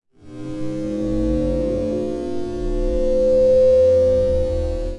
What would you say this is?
Stretched Metal Rub 2
A time-stretched sample of a nickel shower grate resonating by being rubbed with a wet finger. Originally recorded with a Zoom H2 using the internal mics.
fx, nickel